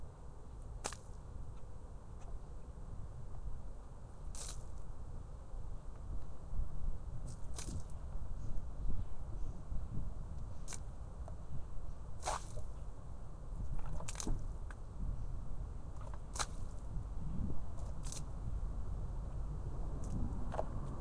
Water Splashes on cement FF237
Water liquid splash splat spill on cement